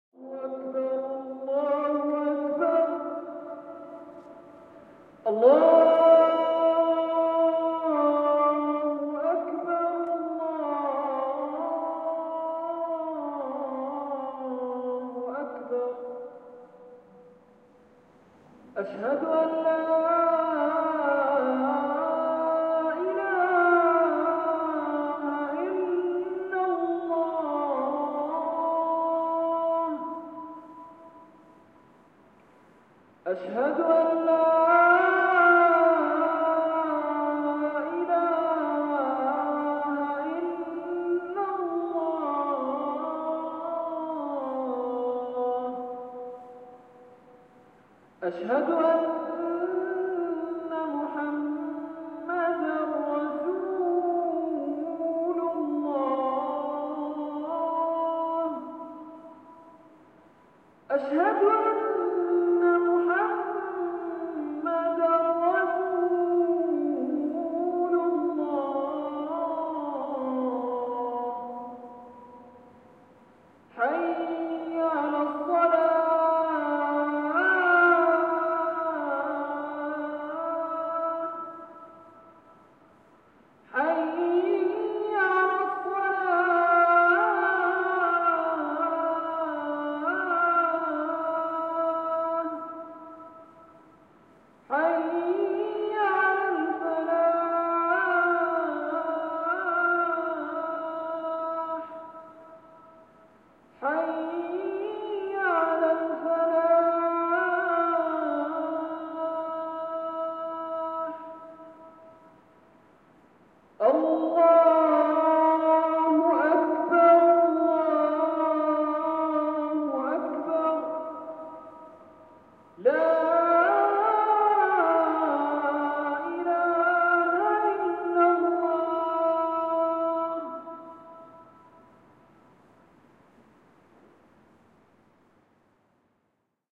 A call to prayer in Ramadan. This is not to be used in any music loop or soundtrack of any kind but as an original sound.
Prayer, Emirates, Ramadan, Arab, religion